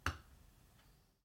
oeuf.pose.metal 01
crack, eggs, organic, crackle, biologic